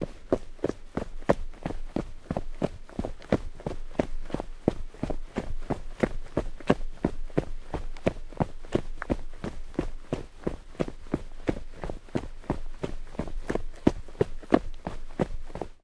Footsteps Mountain Boots Rock Sprint Sequence Mono
Footsteps Sprint on Rock - Mountain Boots.
Gear : Rode NTG4+
field-recording, sprint, rock, boots, steps, stone, footsteps, rodeNTG4